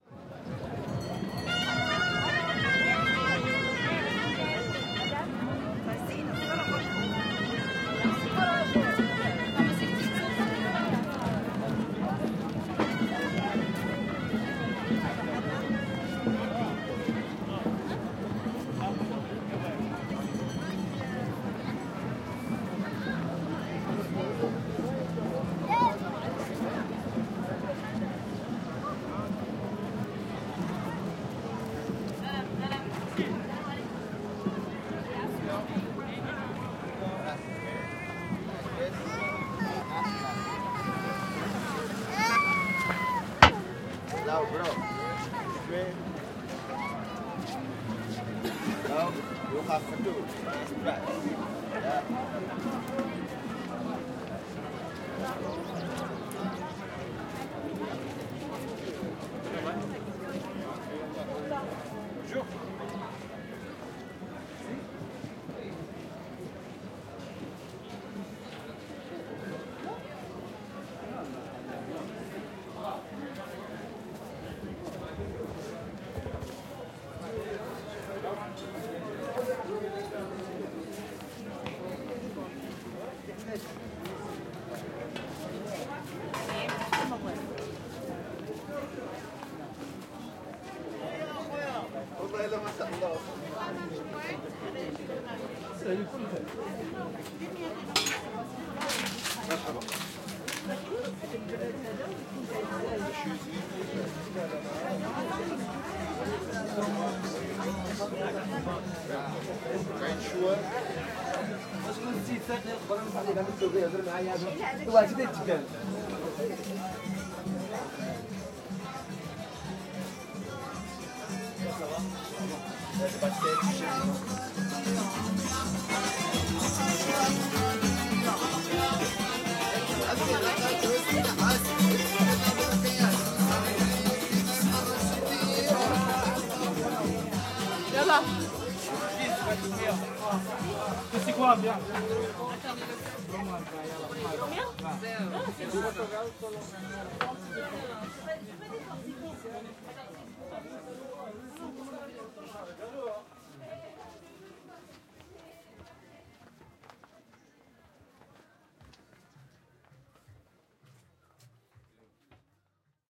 Jamaa el Fna Afternoon Atmosphere 4
Afternoon atmosphere at on the famous place Jamaa el Fna in Marrakech Marokko.
You can hear people talking or making business, snake charmer with flutes and cobra snakes and mopeds drive across the square.
Atmosphere, el, Fna, Jamaa, Marokko, Marrakech, Public, Travel